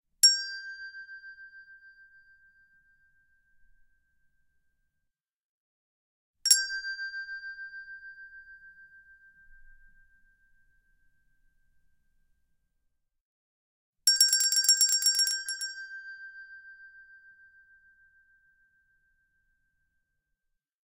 chromatic handbells 12 tones g1
Chromatic handbells 12 tones. G tone.
Normalized to -3dB.
ring, stereo, chromatic, tuned, bell, English-handbells, double, percussion, handbell, single